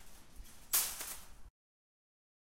superMarket Car 2
another sound of the steel cars from the super market
food, market, mercado